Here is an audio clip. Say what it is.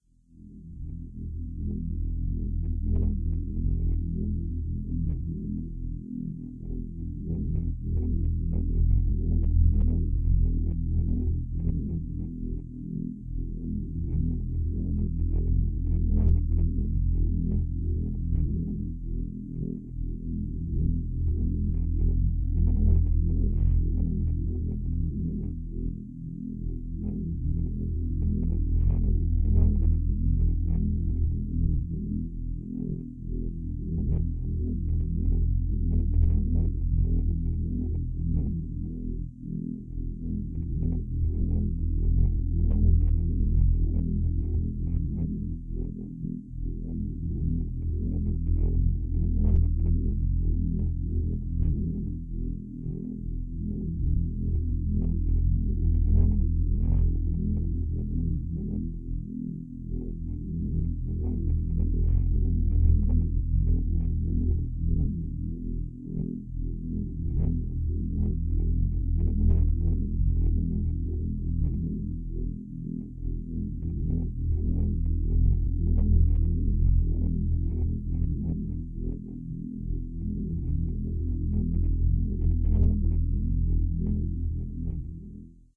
Dead Pulse
Eerie pulsating track based off of soundtracks like the ones in Oculus and The Lazarus Effect.